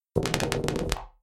pop n hiss
Weird sound made with Garageband.
weird, death-grips, factory, texture, pneumatic, surreal, analog, abstract, electronic, noise, sample, distortion, giger, experimental, hiss, machine, biomechanical